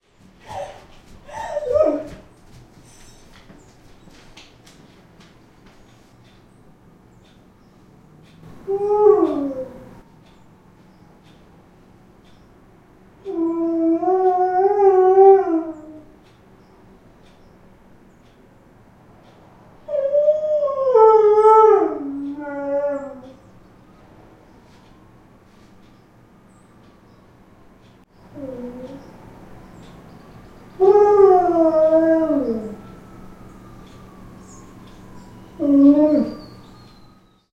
Boris Moan 2
A recording of our Alaskan Malamute puppy made inside with a Zoom H2. Several moans of despair after his best friend leaves.
Wolf, bark, dog, growl, howl, husky, malamute, moan